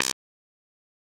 Synth Bass 019
A collection of Samples, sampled from the Nord Lead.